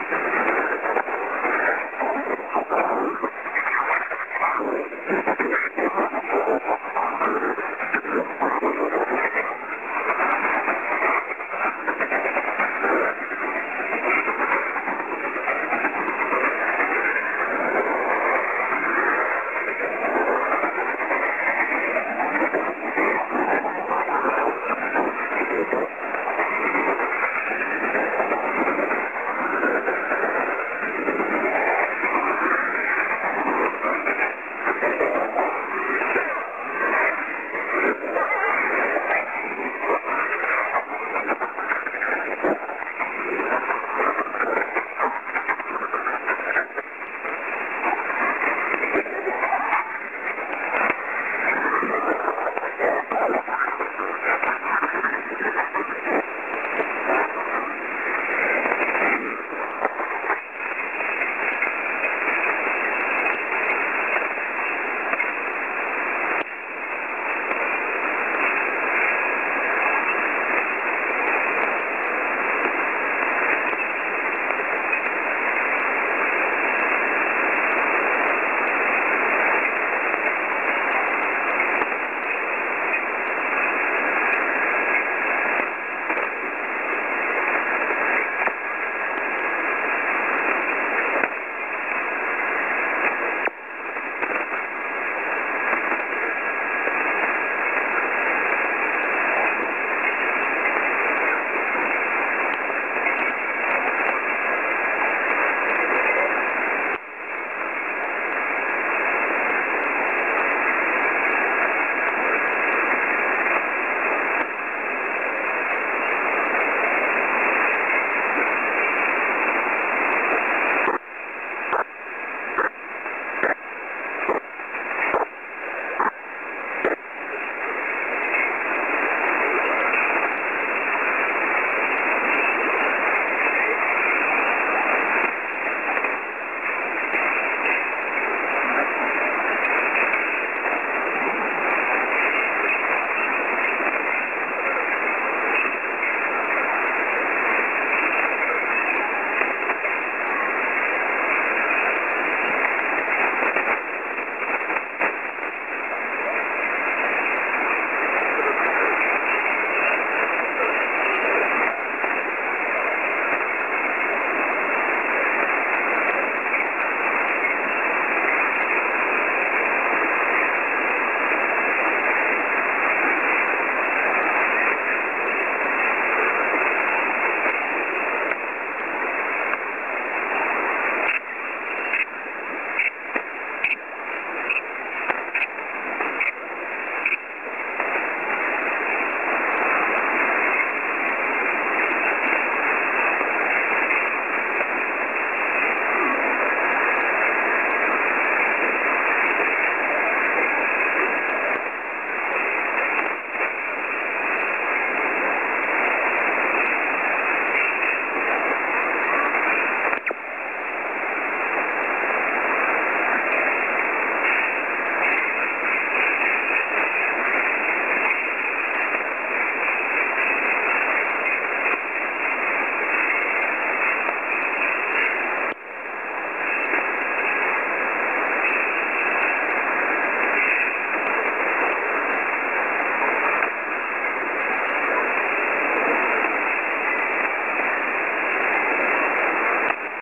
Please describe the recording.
9366.77 kHz LSB
Signals recorded at 9366.77 kHz, lower sideband.